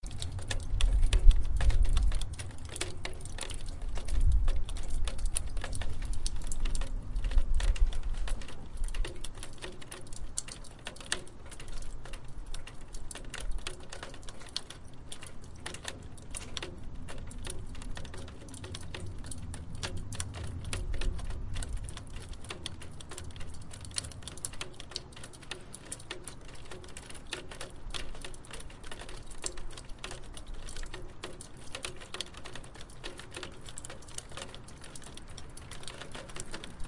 scary drainpipe
Recorded near a drainpipe, I have no idea what the bass wind sound in the background is. I think it was some sort of exhaust from the basement around, but I don't know why it turned off and on. In any case it makes for a very spooky ambient effect.
fan, rain, drips, spooky, drainpipe, scary, exhaust, wet, water